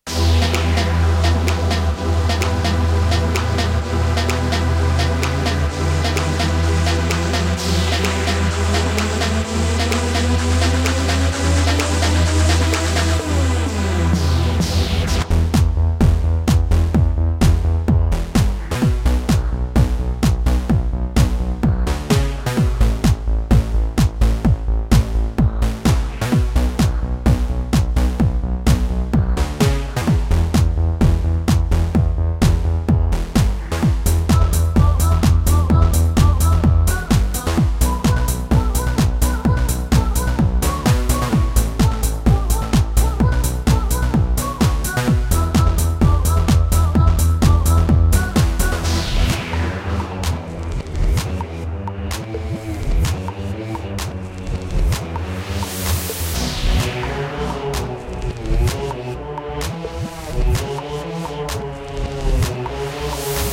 On the Sreet 130
I created these perfect loops using my Yamaha PSR463 Synthesizer, my ZoomR8 portable Studio, and Audacity.
bass, beats, bpm, drum, drums, dubstep, groove, guitar, loop, loops, music, percs, percussion-loop, pop, rock, synthesizer